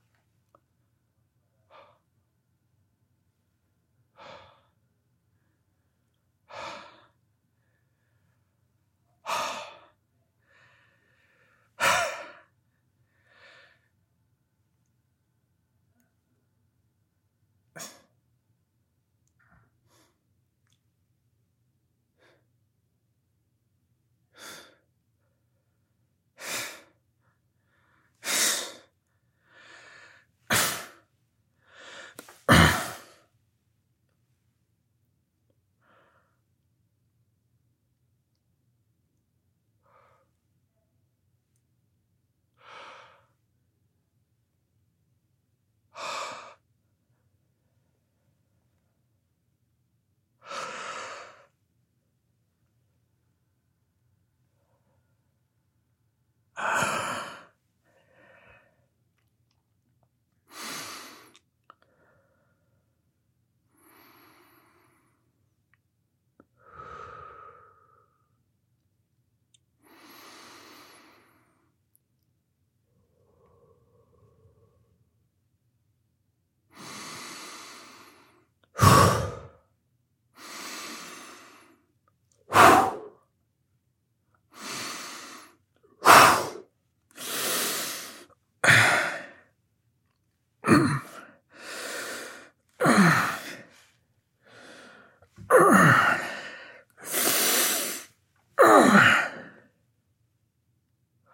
Male Breathing Exhale Grunts
Studio recording of a male breathing, in/out, grunting, lightly to heavily
Air, Breathing, Exhale, expiration, foley, Grunts, Human, Inspiration, inspirations, Male, Souffle, Studio